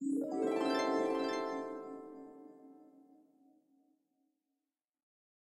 Flashback Sound
dream, flashback, glissando, harp, transition